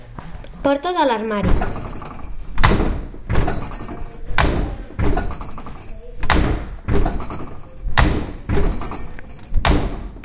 SonicSnaps CCSP closet
Field recordings captured by students from 6th grade of Can Cladellas school during their daily life.
sonsdebarcelona, january2013, cancladellas, spain